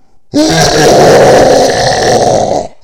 i made this in audiacity...it just me with some effects. hope you like..enjoy